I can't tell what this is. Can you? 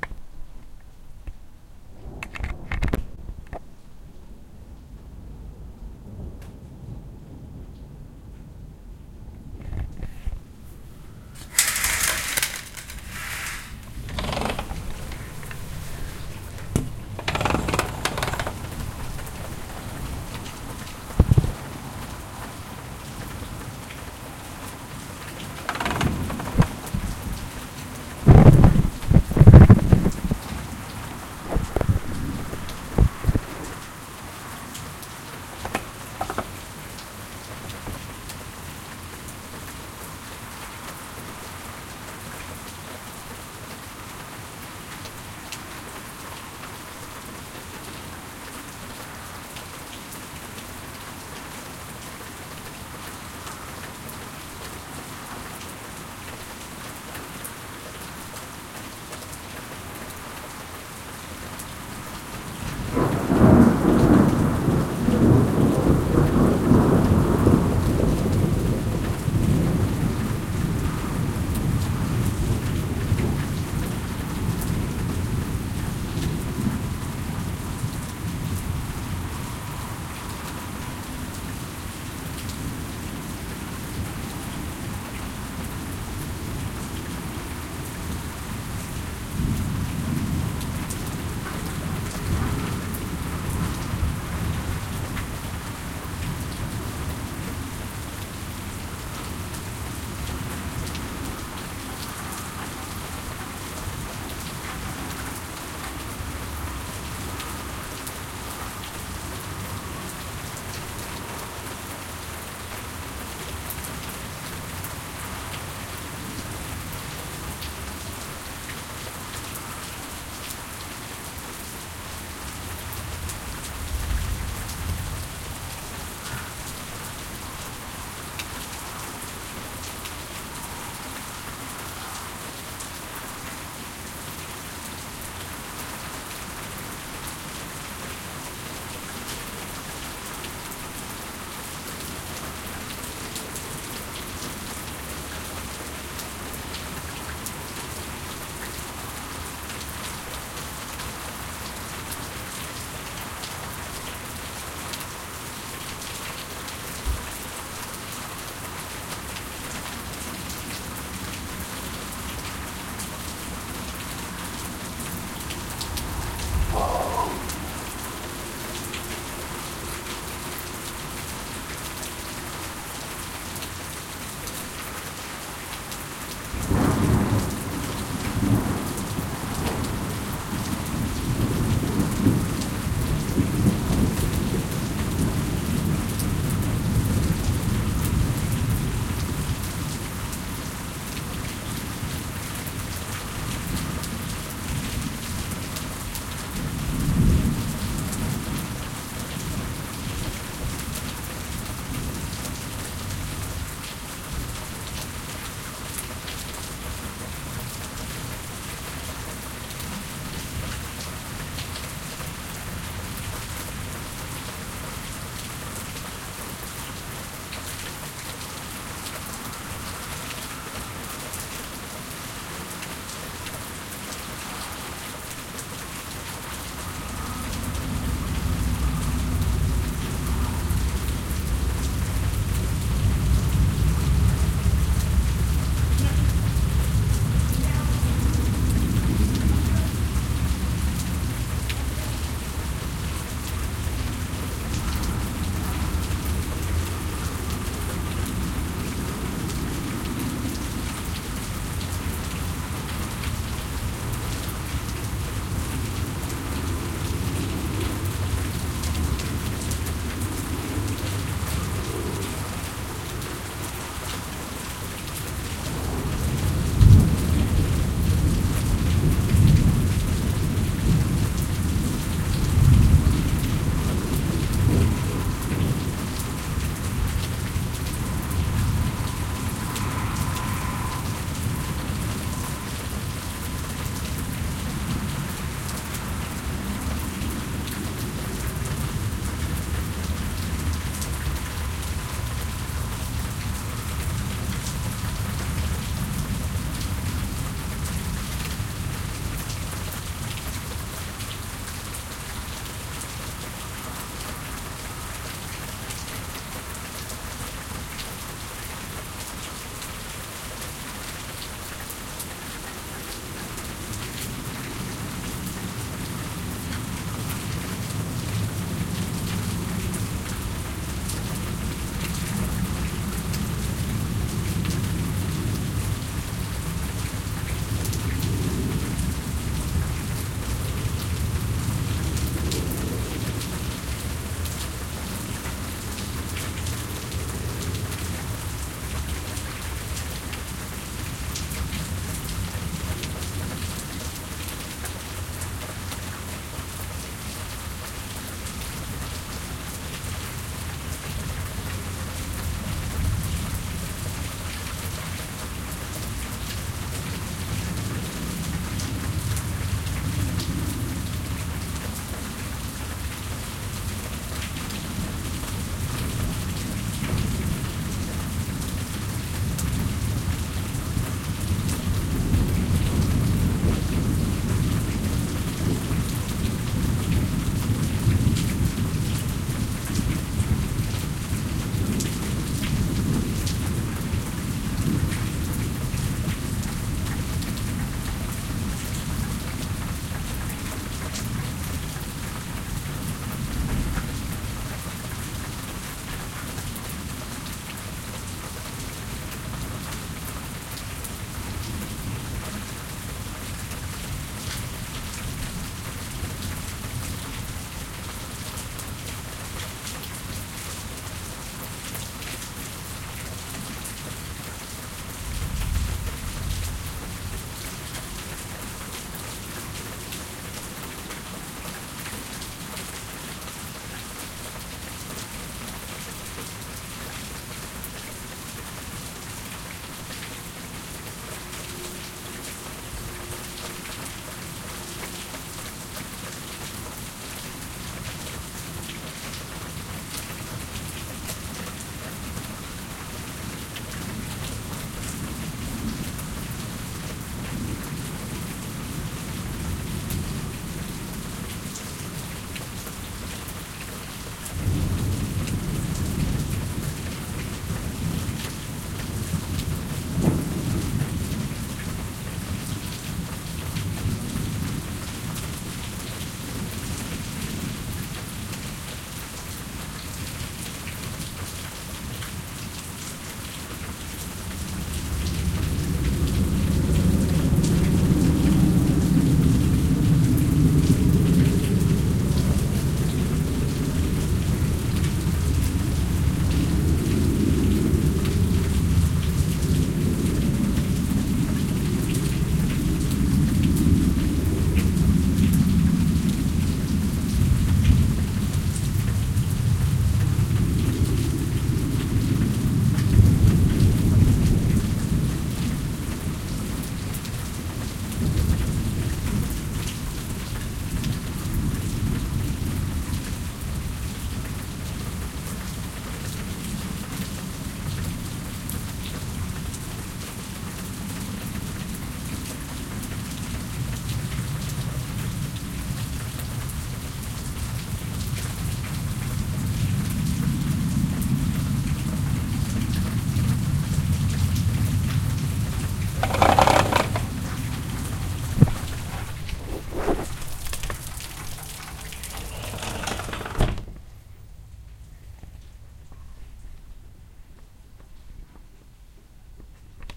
Thunderstorm Rain 1
Storm from my balcony.
storm, rain, thunderstorm, Thunder, lightning